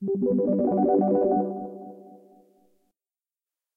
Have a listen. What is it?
Open Program A
Sound for opening a program. Sound was made on a MicroKorg S and is a Cmaj7 chord.
**works well with close_program_A sound effect**
chord, computer, data, interface, menu, open, program, SFX, sound, sound-effect, synth